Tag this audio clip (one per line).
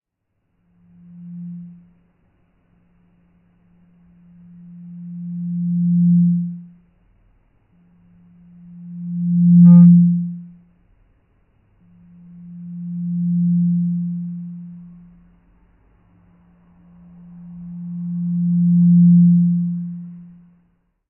feed
live-performance
check
test